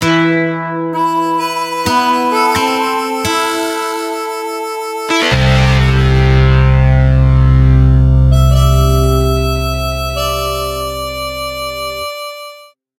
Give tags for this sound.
acoustic
clean
cowboy
desert
distorted
distortion
electric
electric-guitar
guitar
harmonica
nylon-guitar
old-west
overdrive
spaghetti
vibraslap
west
western
wild-west

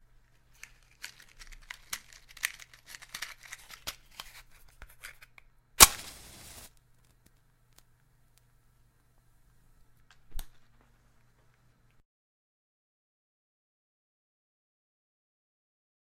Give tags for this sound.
a
match

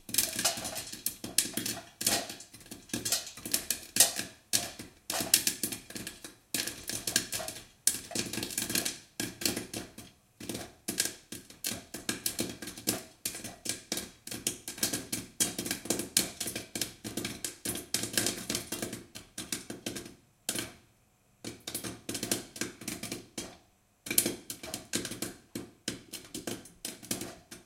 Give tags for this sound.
pop-corn
kitchen

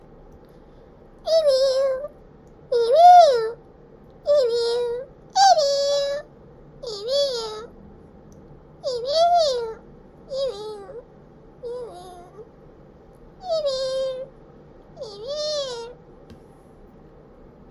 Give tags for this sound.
animal
cat
cute
feline
high
human
kitten
meow
owo
pitched
sound
uwu

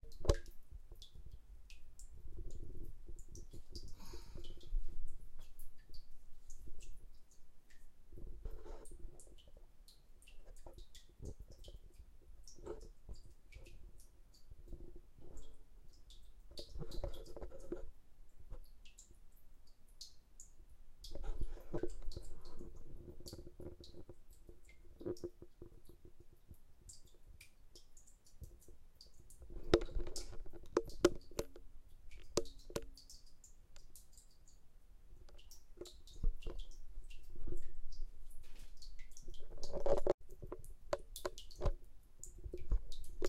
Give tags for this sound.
trans-dimensional
ambient

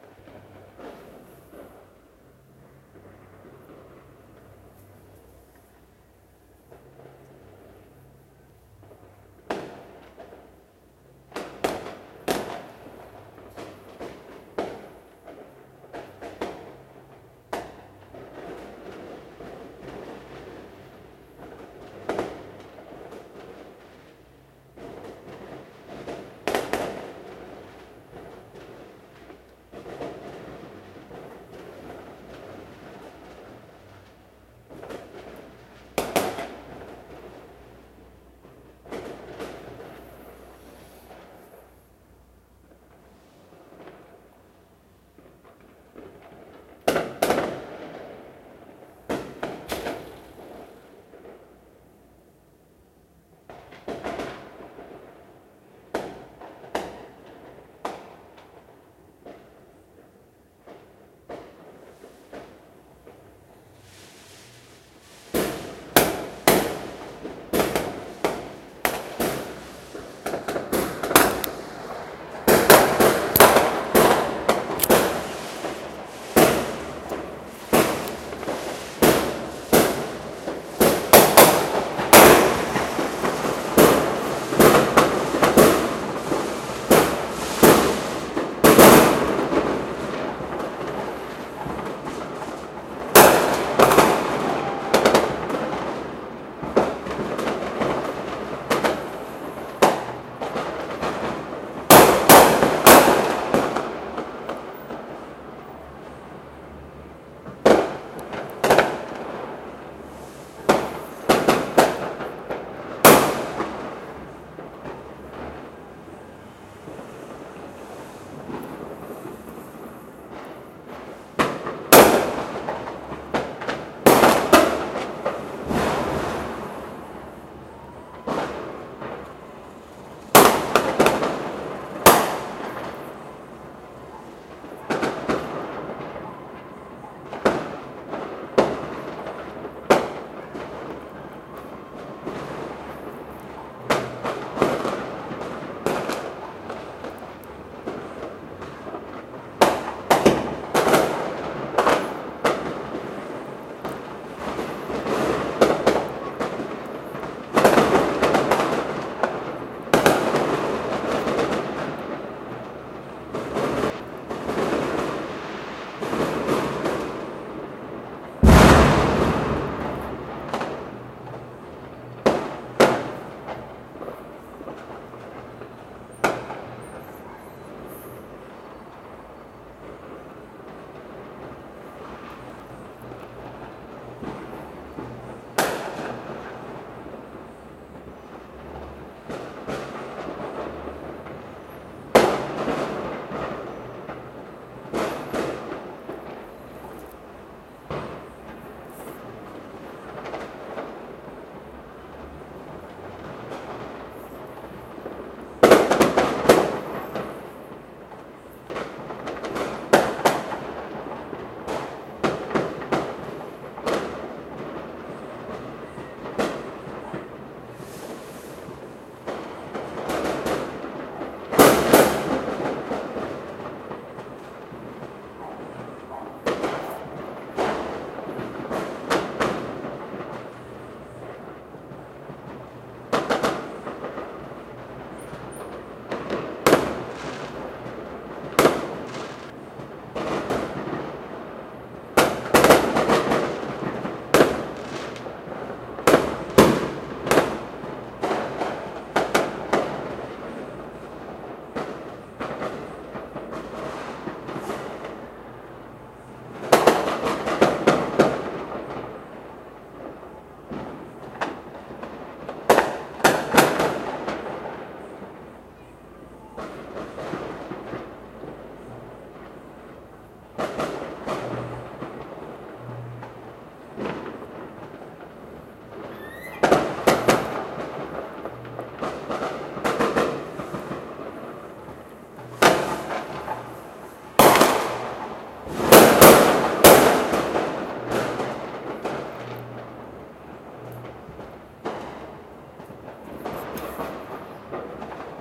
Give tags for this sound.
gunpowder
gunshoot
weapon

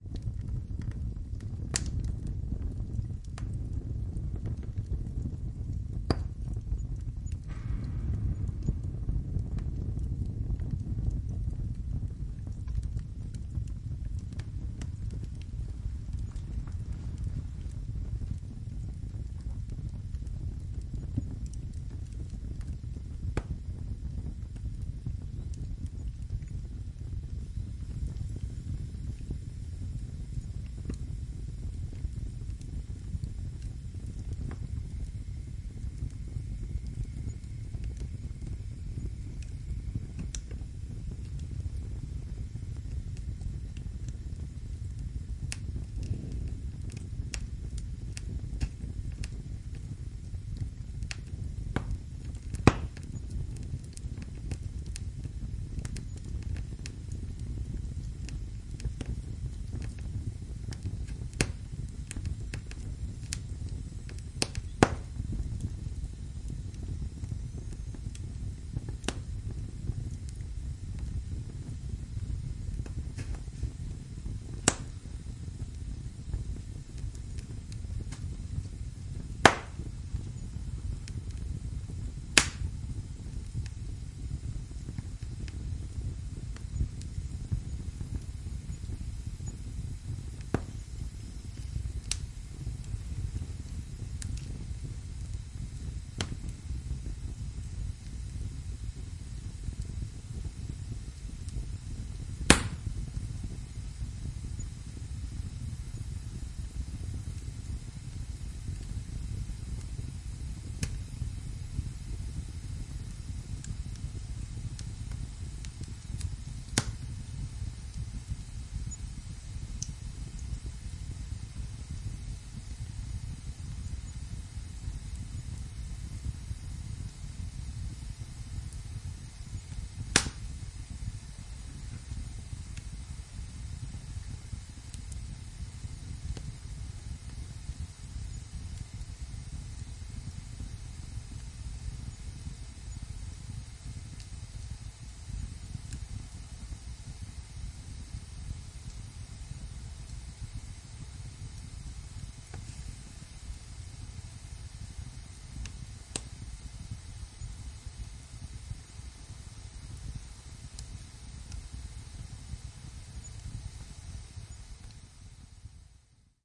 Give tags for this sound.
burn
burning
combustion
crackle
fire
fireplace
flame
flames

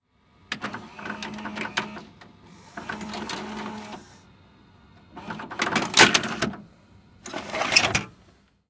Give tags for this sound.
tape
vhs
cassette

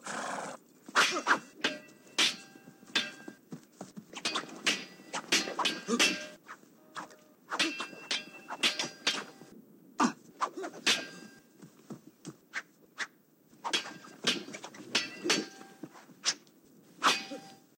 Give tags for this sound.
knight
swords
fight

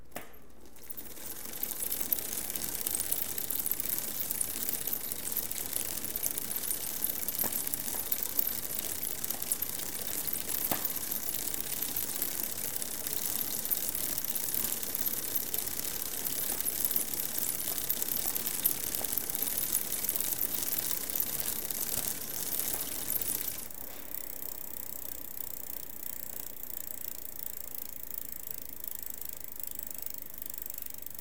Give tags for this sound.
bicycle
bike
chain
click
derailleur
gears
pedaling
whirr

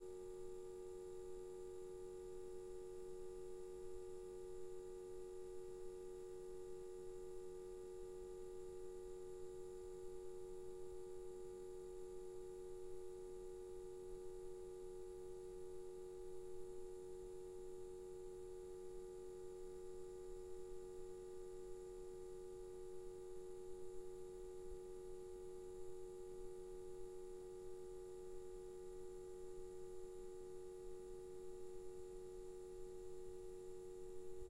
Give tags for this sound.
electricity
lamp
buzzing

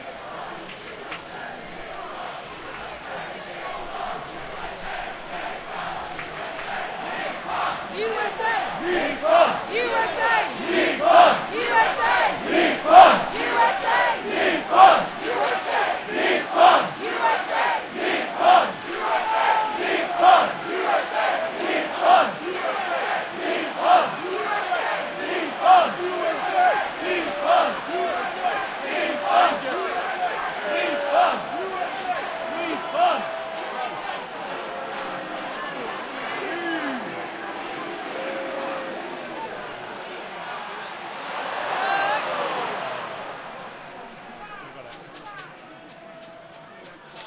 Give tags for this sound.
Rugby
cup

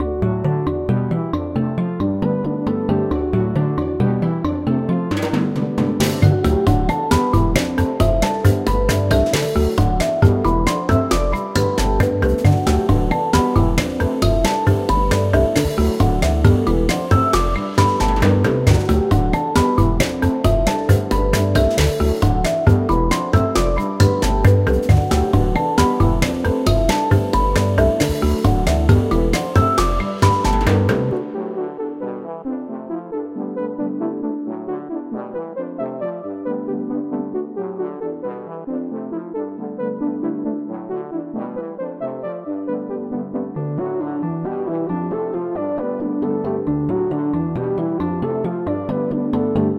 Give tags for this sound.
complex
easy-listening
electronic
happy
idm
joyous
loop
lush
mallet
mellow
melodic
music
optimistic
rhythmic

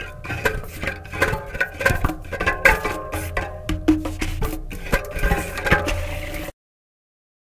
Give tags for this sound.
bicycle
bike
fingers
hand
spinning
spinning-wheel
spokes
wheel
whirr

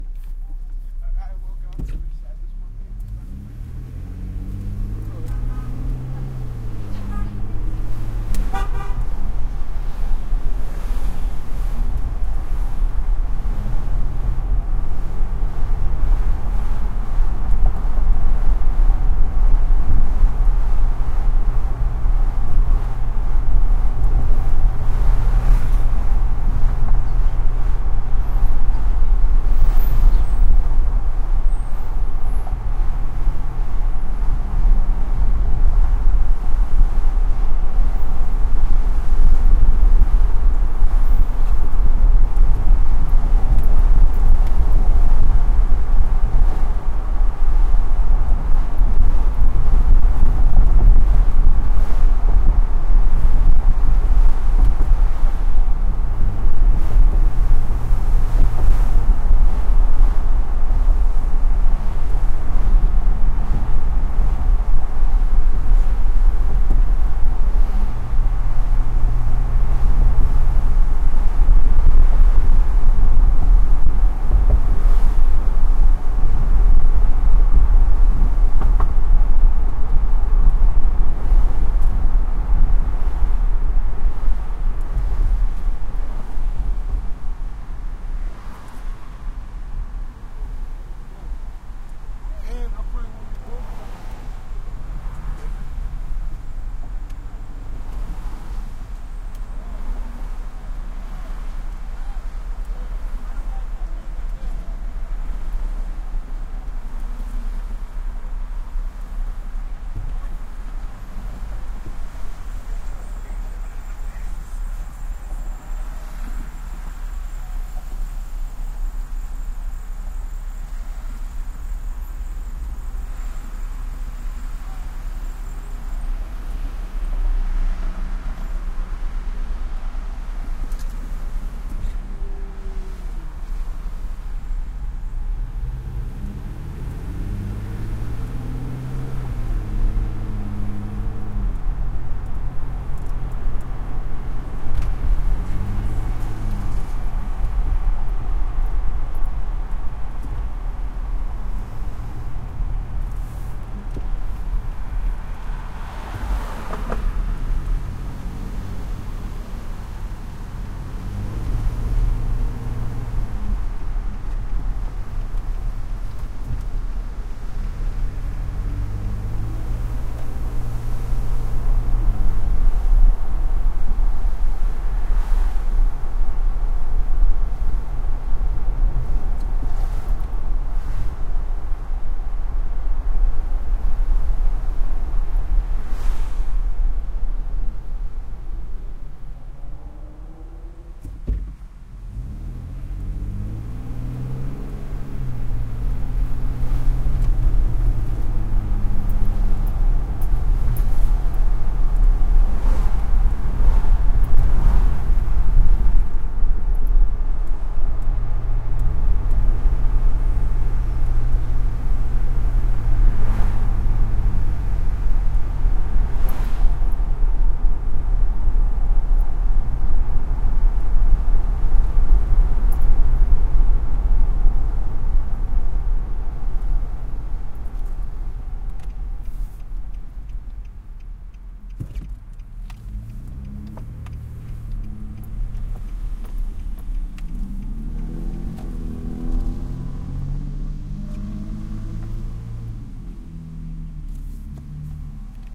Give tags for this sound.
traffic
truck

